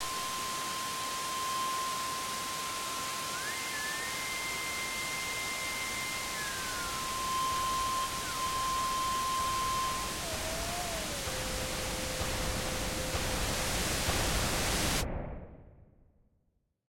Video Distortion
Distorted white noise.